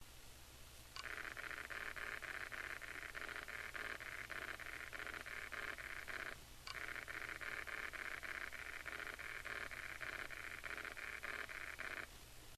hitachi laptop 60gb nospin buzzing
hum, magnetic